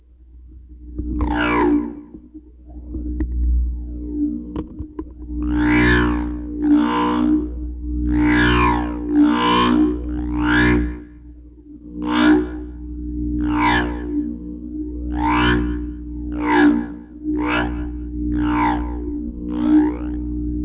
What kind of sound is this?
Recorded with a guitar cable, a zoom bass processor and various surfaces and magnetic fields in my apartment. This is waving it in front of my monitor.... sounds sorta like a light saber...